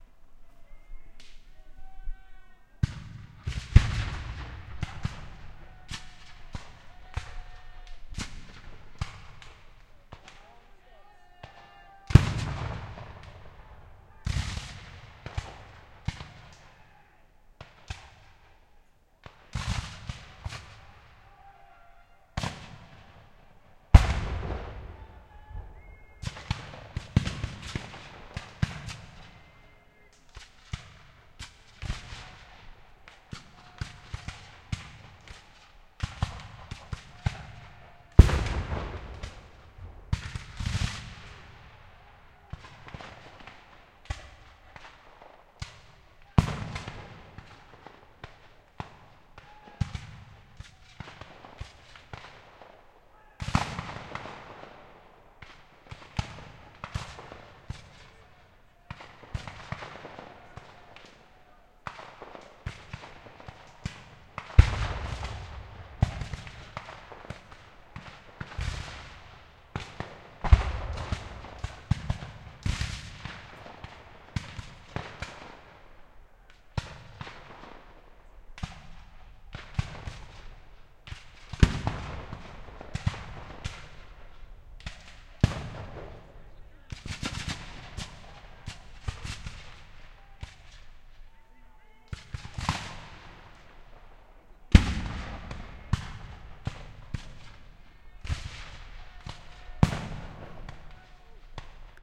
CW Battle endsinVictory
Recorded at a US Civil War re-enactment, Oregon, USA, 2012. Black powder rifles, cannons, almost a full battle. Lots of range. Drum corps, pipes, cries, cavalry charge. Recorded about a football length from the action with a Tascam DR-08.
cannon, cavalry, Civil-War, field-recording, gun, rifle, war